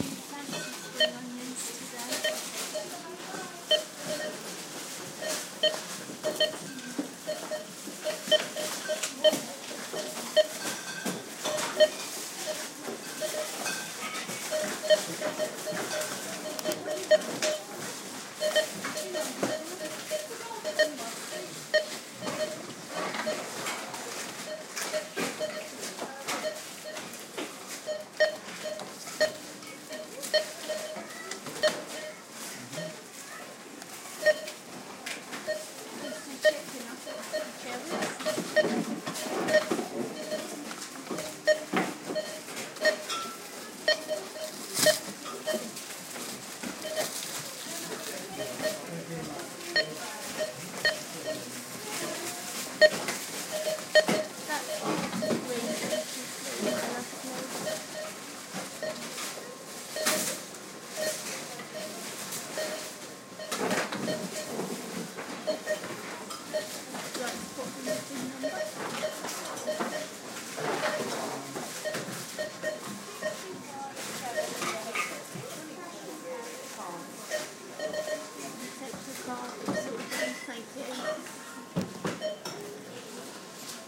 Beeps barcode reader 1
Beeps from several barcode readers in a supermarket which create a delay audio effect. Voices with Milton Keynes UK accents. Sound recorded in Milton Keynes (UK) with the Mini Capsule Microphone attached to an iPhone.
ambience, barcodes, beeps, field-recording, supermarket